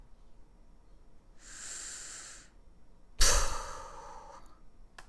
inhale/exhale
slow inhale followed by nervous exhale.
recorded with Blue Snowball microphone.
blow, inhale, exhale, mouth, nervous, breath